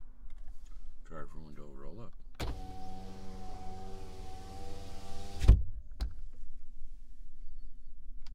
Driver widow up - Suzuki
Driver's side electric window rolling down.
auto, electric, window, automotive, car